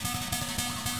a short loop of me playing a three leafed danmoi